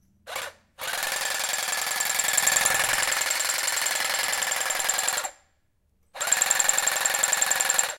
A slicer trimmer sound in my garden.
lawnmower, mower, handheld, cutter, trimmer, lawn, slicer, garden